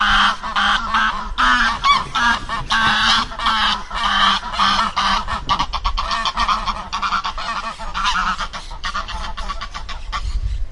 Gravació d'una oca enfadada al Parc de la Ciutadella de Barcelona defensant el seu niu. Angry goose at Ciutadella Park
Recorded with Zoom H1 - 11/03/2017